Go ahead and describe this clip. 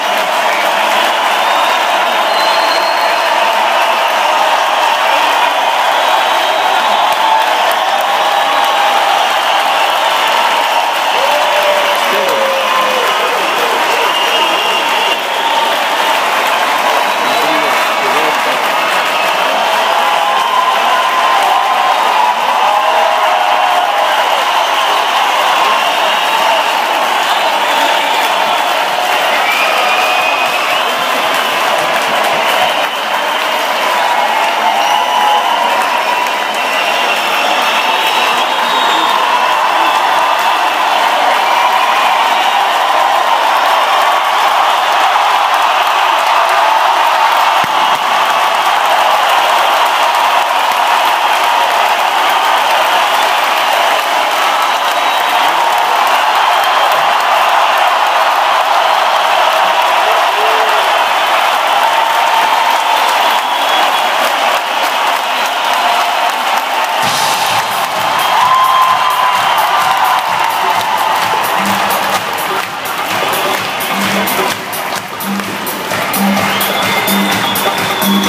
Applause encore
Encore Applause from Toto's live concert in Bulgaria in 2015 year.
auditorium, crowd, clap, toto, applause, live, applauding, audience, clapping, band, show, cheer, performance, people, theatre, encore, concert